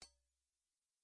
airsoft in a bottle
shooting an airsoft gun into a big glass bottle
bass, gun-cocking, airsoft